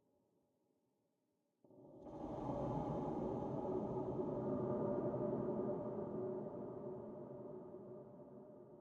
Dark Wind
Sonido del viento en un lugar desolado